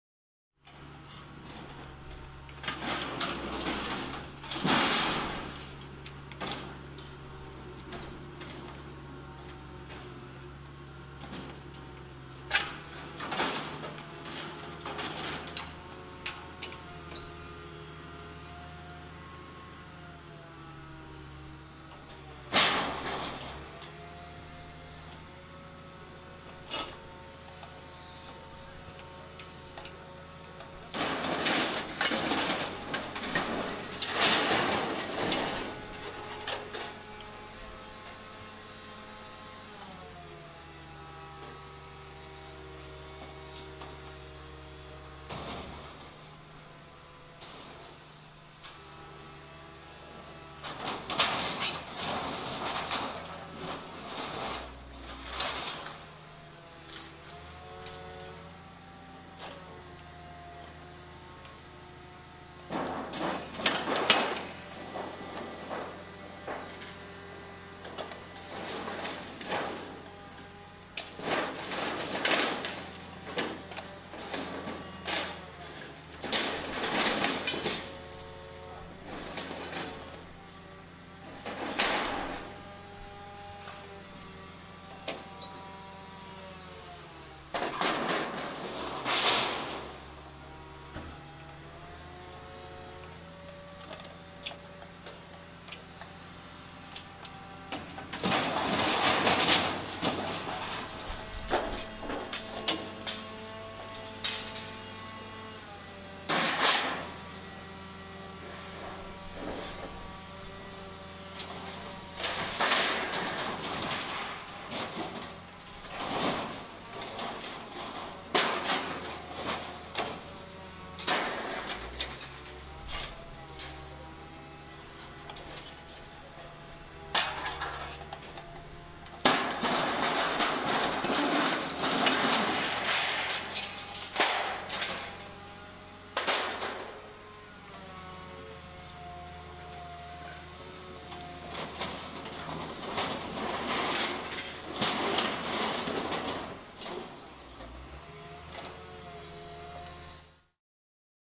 More car wash clanging
Recording from my apartment window of a carwash being destroyed and put in the back of a truck by a crane.
carwash, metal